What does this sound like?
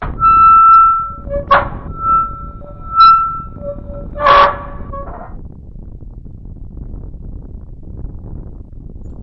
A abandoned place in the Sonora desert. Silent. Except for the wind you hear only the sound from a rusty wind mill.